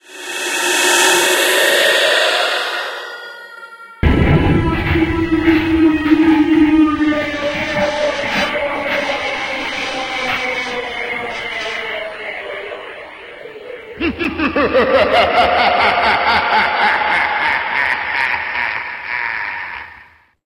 Remix of sound effects to provide a jump scare for a Halloween prop